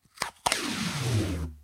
Some tape. Sounds like a laser.